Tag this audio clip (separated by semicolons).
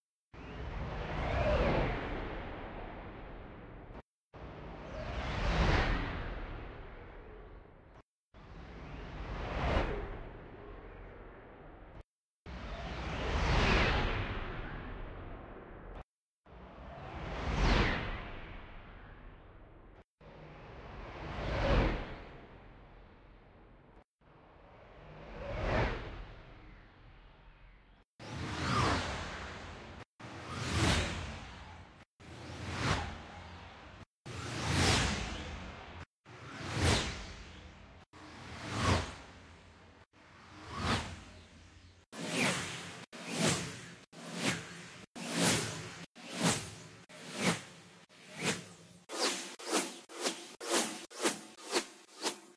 whoosh; surround; swoosh; front-to-back; flyby; swooshes; PLII; whooshes; back-to-front